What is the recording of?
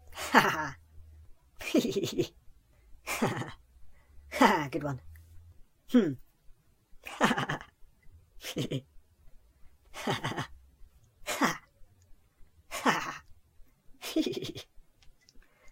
Voice Request #2c - Laughing (High)
A response to a request. This version has been sped up by 25%.
An example of how you might credit is by putting this in the description/credits:
The sound was recorded using a "H1 Zoom V2 recorder" on 1st October 2016.
voice, male, laughing, high, request